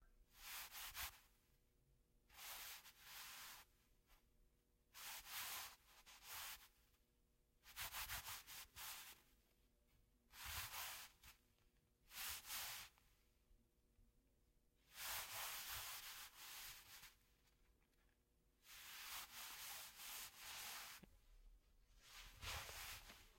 Cloth Rustle 1
Cloth for foley
cloth, film, foley